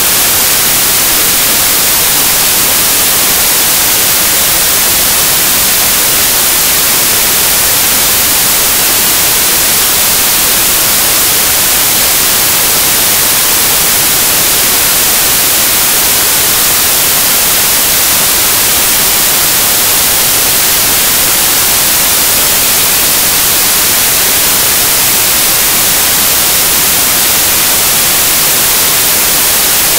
Tv/radio static or white noise. Generated in Audacity.